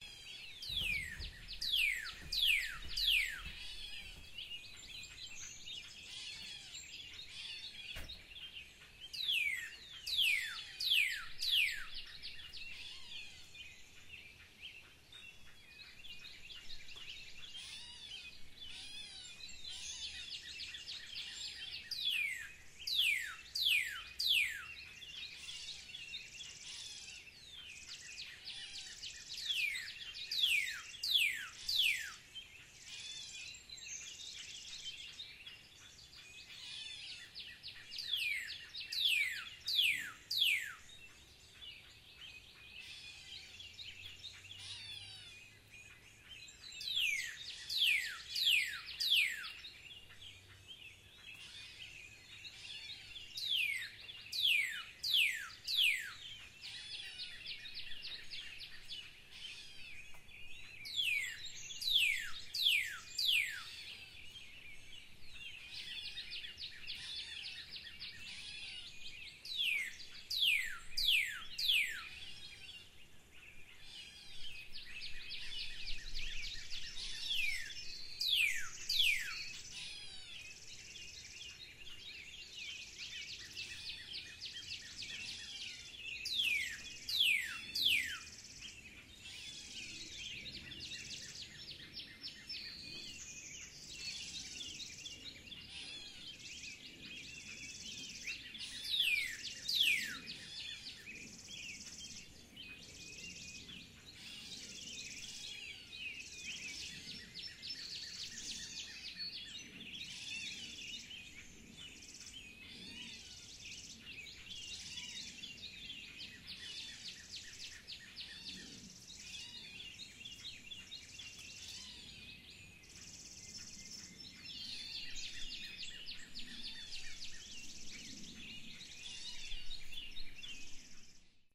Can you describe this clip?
Bird call in spring
Collected on a spring morning in Chapel Hill, NC. Very prominent bird call emerges from a number of birds making noise. Recorded with Zoom H4n
chirp, bird